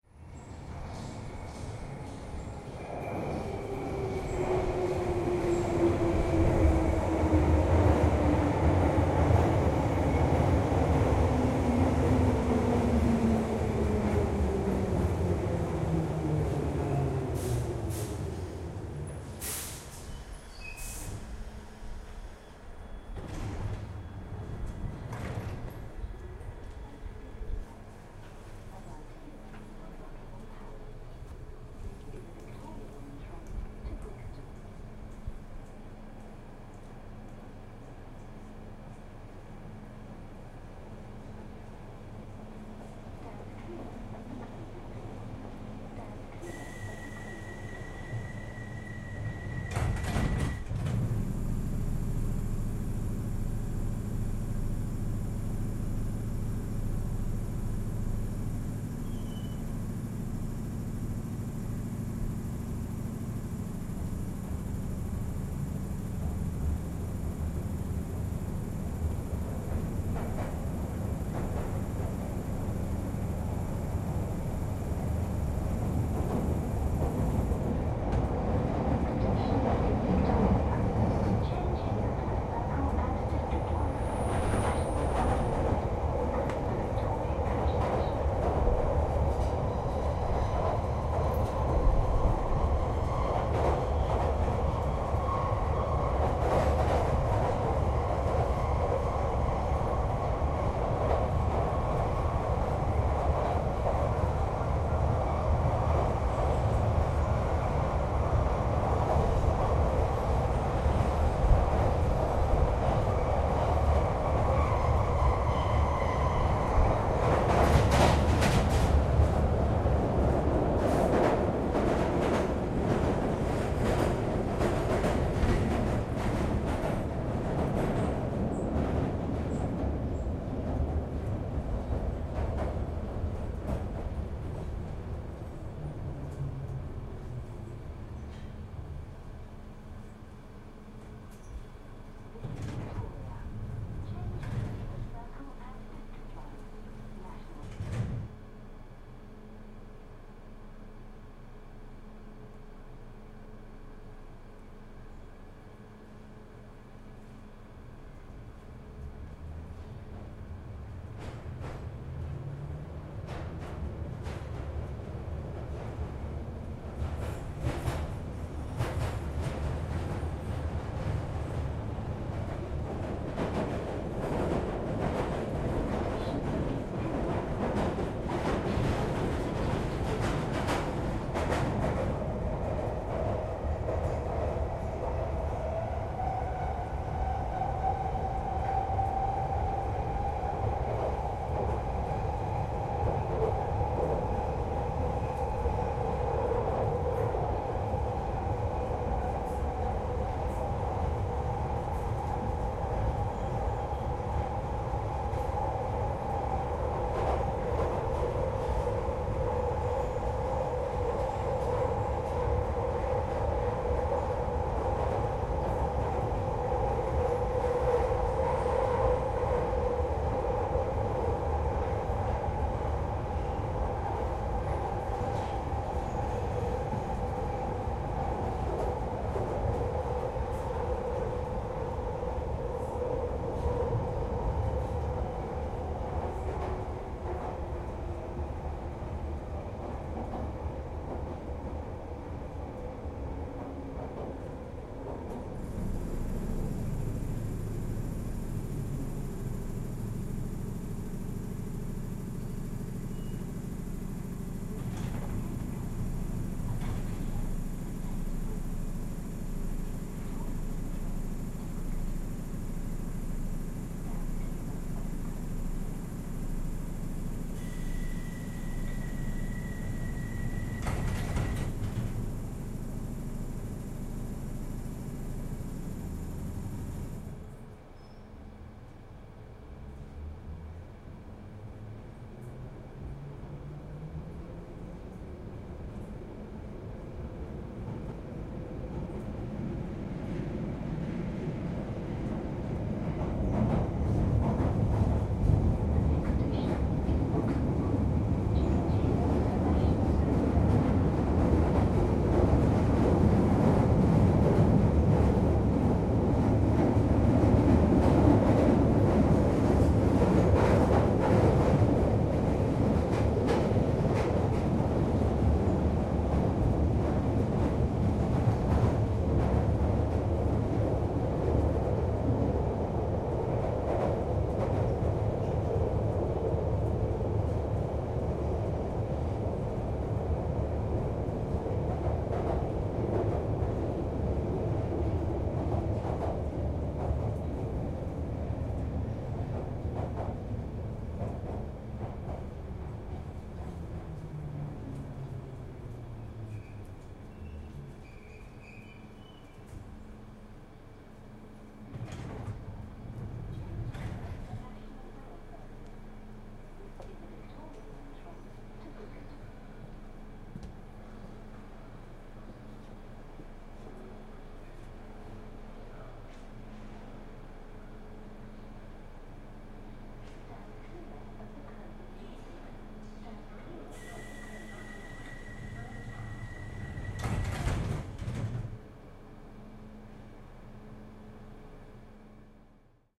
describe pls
Tube - to brixton 2
Travelling south on Victoria line. Quiet station announcements can be heard. Nearly empty carriage.
subway, train, tube, underground